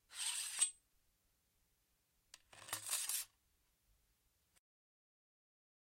Sword being restored to its sheath
Recordist Peter Brucker / recorded 4/21/2019 / shotgun microphone / pipe and scrap metal